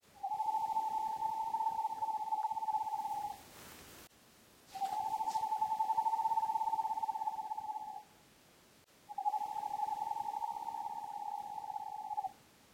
A screech owl I recorded in my backyard, late at night. Very low ambient sounds. Beautiful creature.
bird, birds, field-recording, nature, night, owl, screach, screech, screech-owl, summer